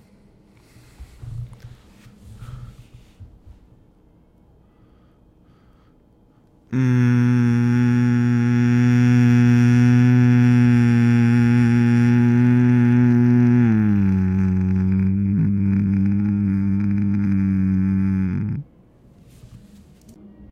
Groaning Low
I groan in a low tone.
groaning, low, MTC500-M002-s13, tone